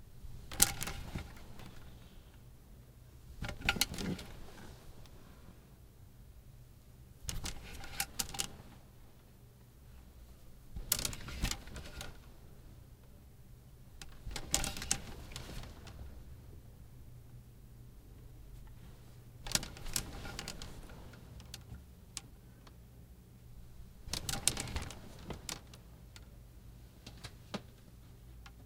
The sound of someone moving the clotheshangers in a closet, looking for a garment.